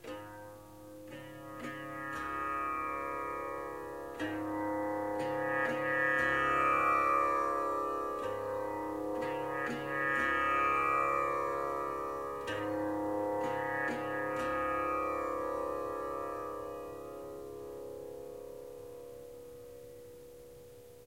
Tanpura Pa-sa-sa-Sa 04 C sharp
Snippets from recordings of me playing the tanpura.
Tuned to C sharp, the notes from top to bottom are G sharp, A sharp, C sharp, Low C sharp.
In traditional Indian tuning the C sharp is the root note (first note in the scale) and referred to as Sa. The fifth note (G sharp in this scale) is referred to as Pa and the sixth note (A sharp) is Dha
The pack contains recordings of the more traditional Pa-sa-sa-sa type rythmns, as well as some experimenting with short bass lines, riffs and Slap Bass drones!
Before you say "A tanpura should not be played in such a way" please be comforted by the fact that this is not a traditional tanpura (and will never sound or be able to be played exactly like a traditional tanpura) It is part of the Swar Sangam, which combines the four drone strings of the tanpura with 15 harp strings. I am only playing the tanpura part in these recordings.